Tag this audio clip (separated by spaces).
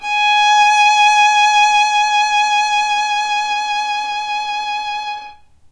violin vibrato arco